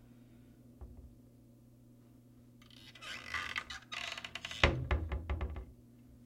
Opening:Closing Cubord Door
Closing Cubbord Door
sound, Closing, cubord, door-creaking